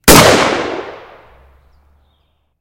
AR15 rifle shot
A powerful AR15 rifle being fired.
ar15, army, firing, gun, gunshot, military, rifle, shooting, shot, weapon